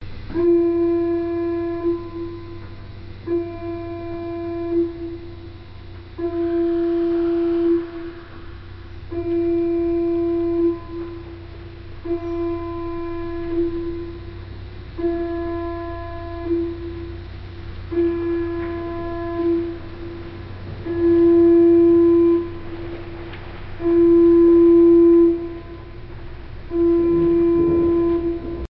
A alarm sound recorded with the Sony A7RII in slowmotion mode, sounded pretty cool and had to share it with you guys. Credits would be much obliged.